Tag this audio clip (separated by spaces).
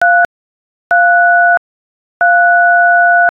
keypad button tones 3 dial key telephone dtmf three